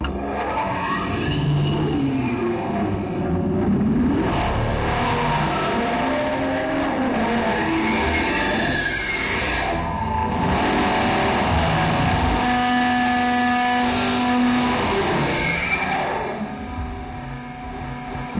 mach g 28lgmp2
A very noisy feedback driven guitar sample made with my Strat and heavy with effects.
electronic, feedback, guitar, loop, monster, music, noise, processed, rhythmic, roar